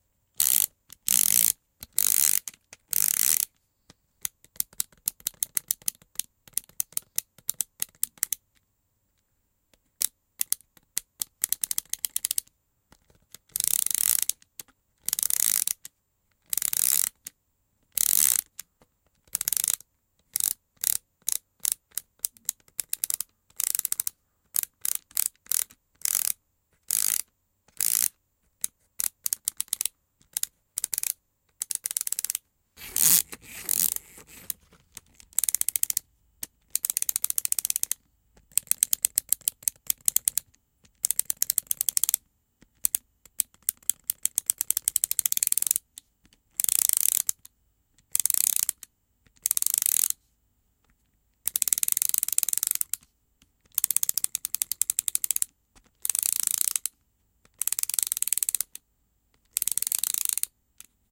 Small Mechanism, Switch, Stretch,Manual Servo, Plastic Ratchet.